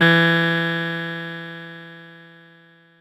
fdbck50xf49delay6ms
A 6 ms delay effect with strong feedback and applied to the sound of snapping ones fingers once.
cross, delay, echo, feedback, synthetic